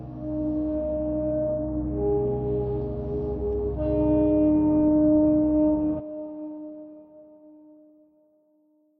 A sample from a song that becomes manipulation by pitch bend